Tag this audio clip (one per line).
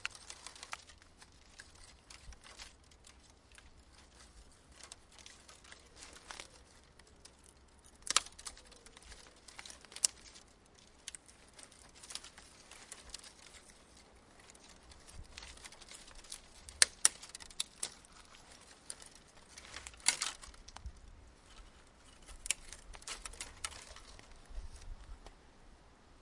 branches
Small
sticks